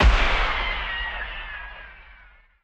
Pistol fired outdoors with ricochet. Single shot, fairly close, with fast fadeout. Narrow stereo image, no panning, almost monophonic.
Wholly synthesized. No weapon was fired in the making of this sound. It's an emulated sound effect of a sidearm gunshot with a cliche ricochet sound, like in the vintage Western movies and WW2 films that they showed on TV when I was a kid. Can be used in a game, perhaps, or a none-too-serious vidclip.
The sound of the pistol cartridge explosion is default FLStudio Kick, Clap and Snare slowed right down. A single tap of delay is added to lend an impression of echo off a middle distance hard surface.
The ricochet noise was made with Sytrus running as a VSTi inside FLStudio. It took an entire day of head scratching, oscillator tweaking and envelope mangling to get something that reasonably resembles a sound that I recall from the old movies. I think I'll shoot myself before I try something like this again.